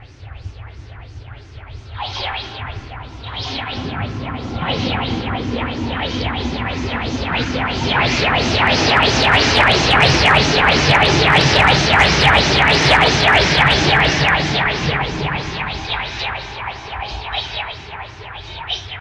U3 near ruin with Wah Wah
The same local Train going over some Poins at a moderate speed. Edited using "Audacity", with echo effect and Wah Wah.